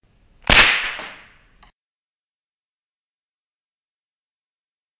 Sounds like two rocks hitting each other hard. Sounds kinda like an explosion too
rock, bang, explode, rocks, hit, smack, explosion